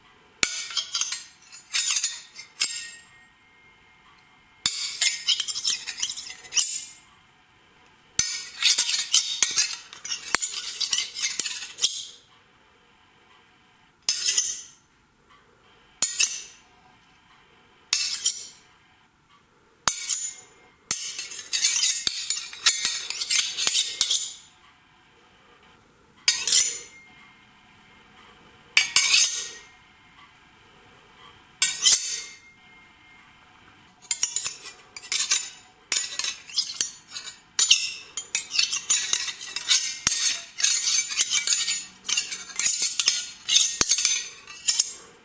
Sword slides
Made with a table knife and a dagger